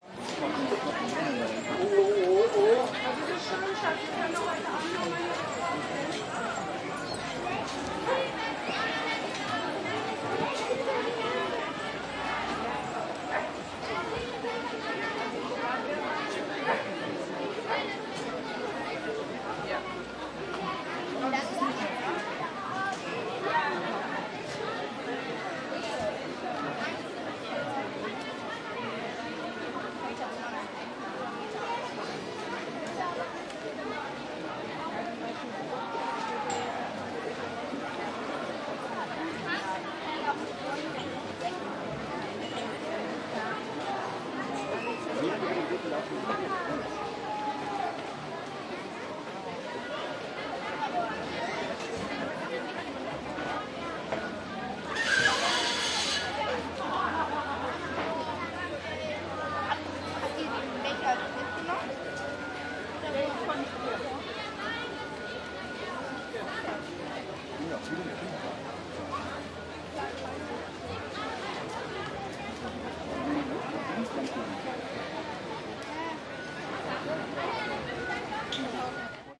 Atmo Mittelaltermarkt Burghof Walla SF 1
I joined a middleages-market in september in Germany. The atmo I recorded (using Zoom H4n with built-in microphones) was taken at the inner ward of an old castle in Dreieichenhain, Germany.